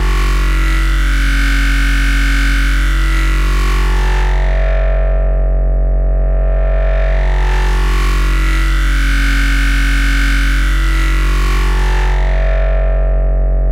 a Weird bass I made with Native Instrument's MASSIVE.
Rootnote is F#2 as said in the filename.
camelphat, bass, reesebass, ti2, camelcrusher, filter, drum, b, n, notch, virus, bandpass, phat, dnb, access, ti, c, native, reese, massive, instruments